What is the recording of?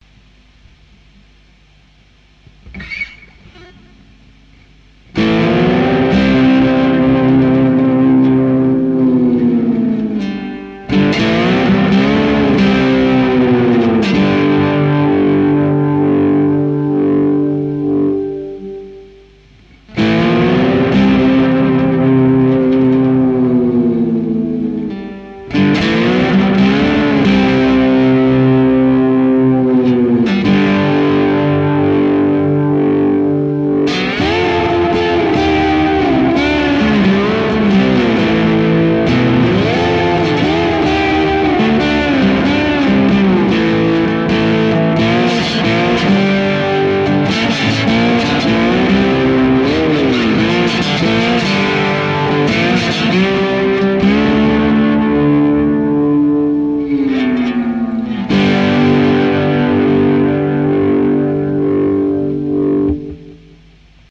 Western-style sliding guitar
Western-style guitar music.
western, experimantal, guitar, instrumental, music, experimental, electric